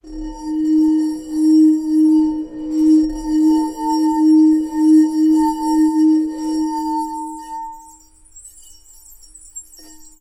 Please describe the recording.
bohemia glass glasses wine flute violin jangle tinkle clank cling clang clink chink ring